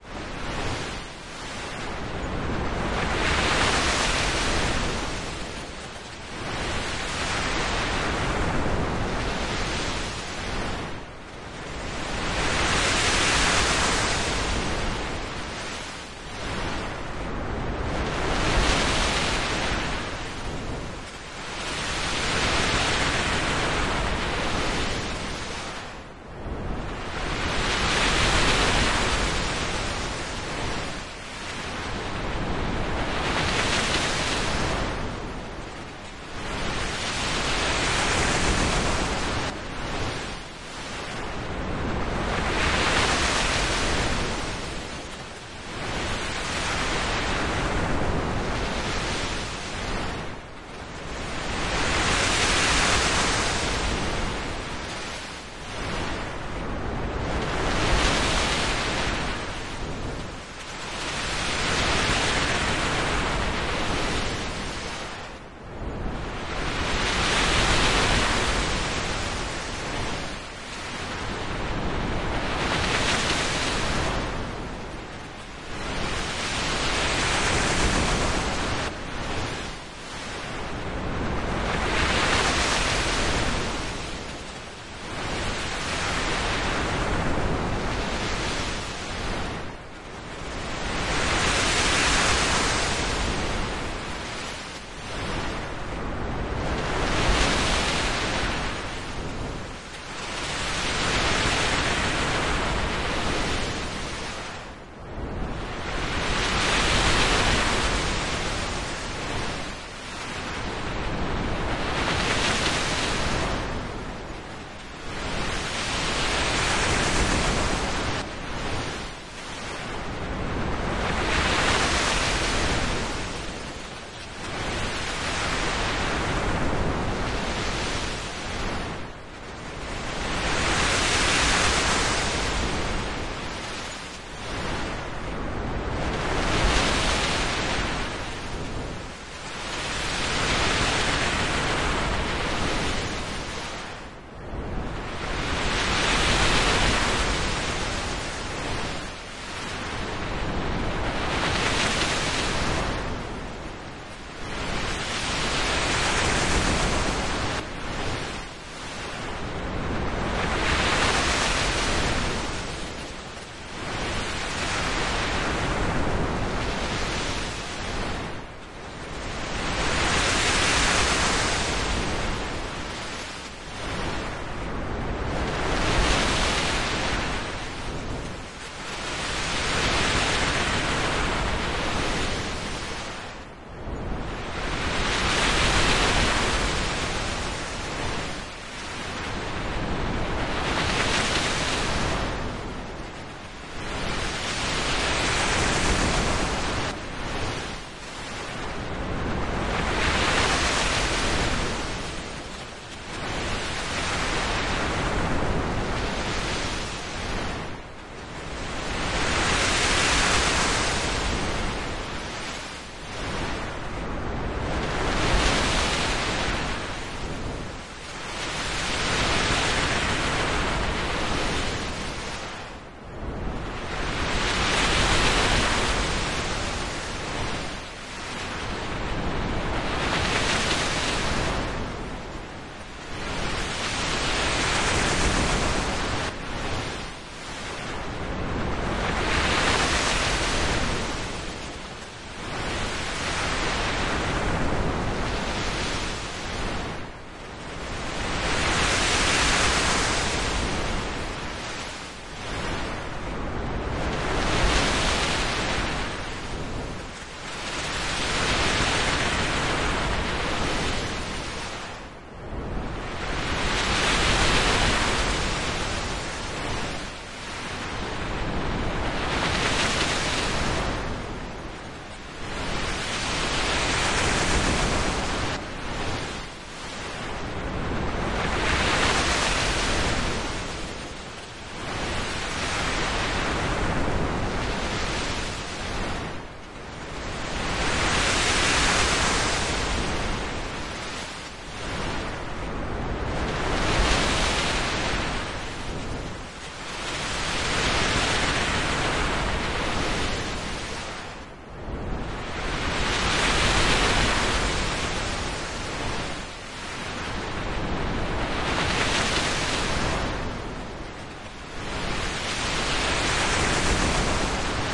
sea loop
made in reaper and audacity synthetic sea noise and looped
encoded, loop, matrix, quadraphonic, stereo, synthetic-sea